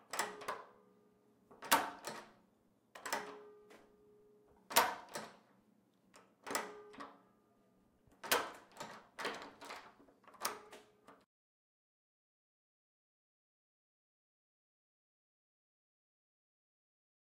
Door Lock Unlock
Locking and unlocking a door
door, lock, unlock